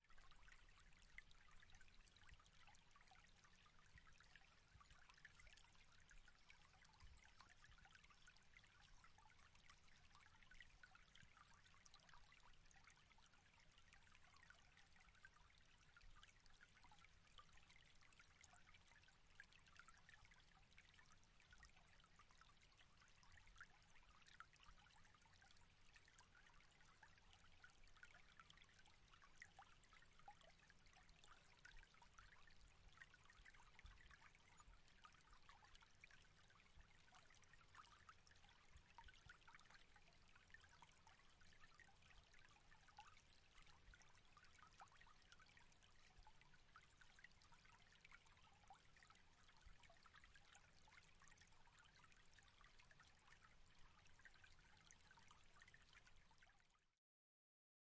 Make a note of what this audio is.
Streamlet (extremely subtle,soft & magical)
At the National Park of Germany. Normalized +6db.
magical-streamlet, subtle-streamlet, soft-streamlet, streamlet